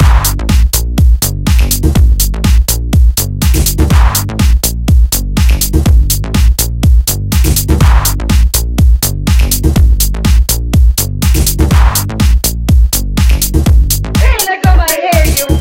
Let Go 100bpm
Minimal beat with voice sample stuck on end.8 Bars. She's having a bad hair loop!
Loop, Techno